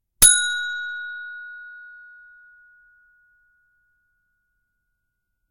The sound of a buzzer from a boardgame.
Recorded with the Fostex FR2LE recorder and the Rode NTG3 microphone.